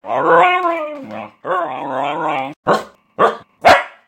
Husky dog barking and "talking"
set of my 1yo husky growling, barking sounds. Use wherever u want
Wolf, husky, dog, malamute, growl, bark, set